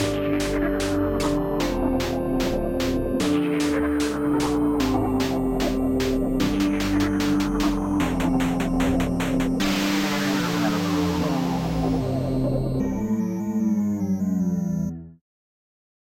Relaxing Dubstep music
Its not that much like dubstep, but it's pretty relaxing depending on your music taste. use it for anything you need!
ambient, dub-step, dubstep, music, new-age, relax, reverb, song, sound